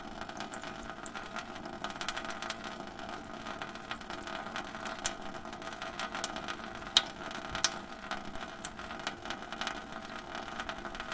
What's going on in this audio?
light water in sink
Light water running into a metal sink.